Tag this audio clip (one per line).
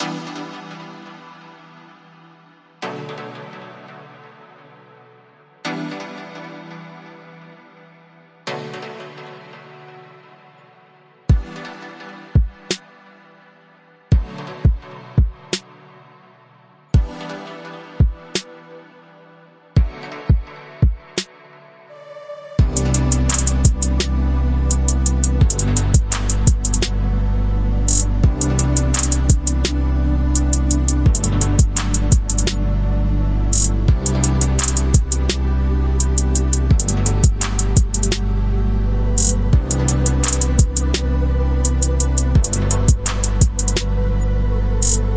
EDM
Music
Dance